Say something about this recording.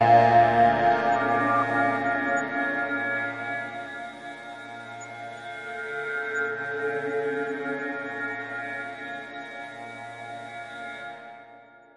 ab fog atmos
a experimental haunting sound